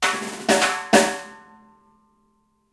Snare drum fill for reggae sampling.
Recorded using a SONY condenser mic and an iRiver H340.
snare, drum, fill, reggae